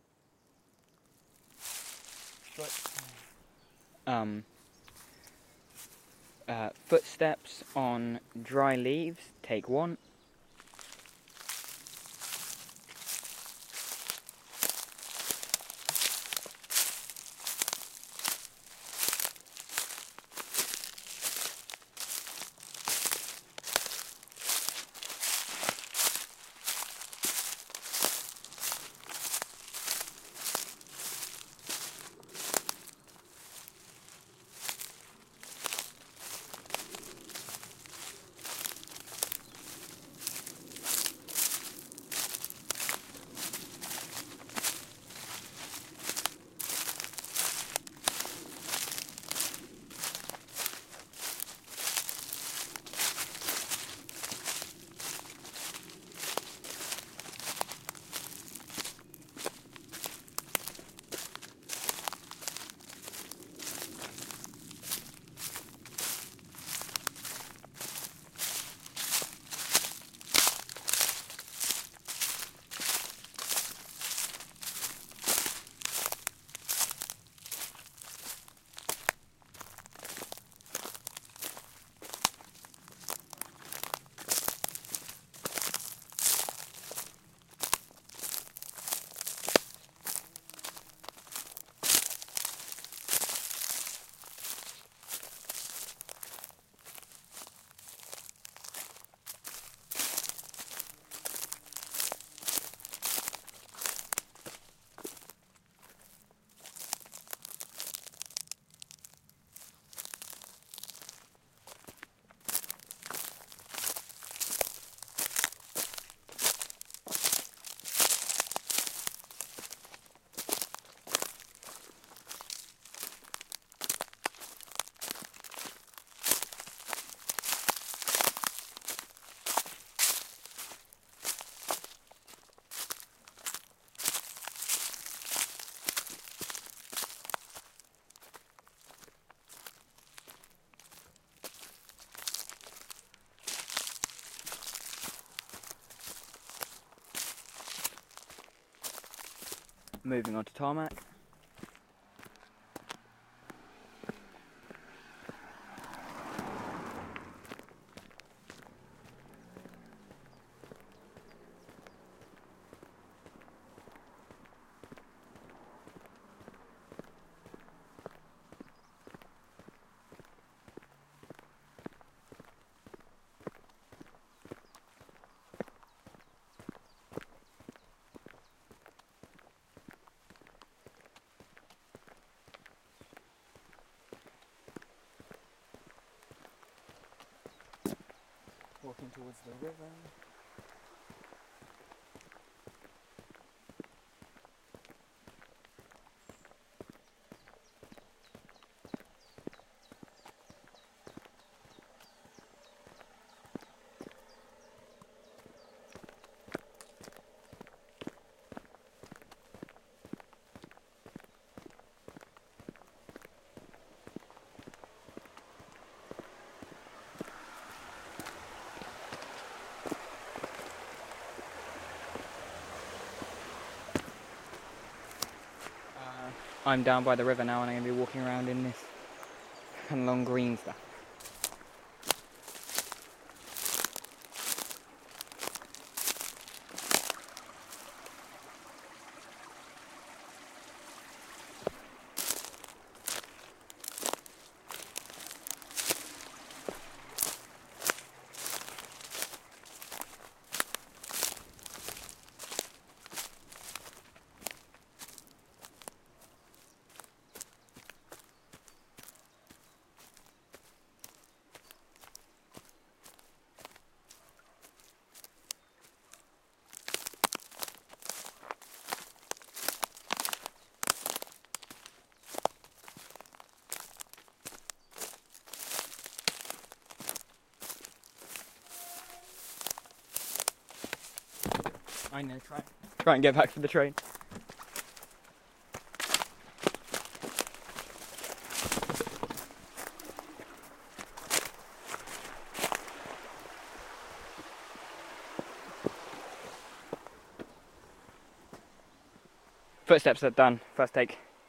Footsteps on Dry Leaves, Grass, and Tarmac (Cornwall, UK)
This a spare recording from a short film I was making. It's a stereos track but all sounds are recorded to the left channel. This was recorded on a ZOOM H4N and a Sennheiser ME67. This sound is me walking on several surfaces near a stream in Cornwall, UK. I walk in a woodland first, then on a road, then by a stream.
footstep, footsteps, leaves, river, walking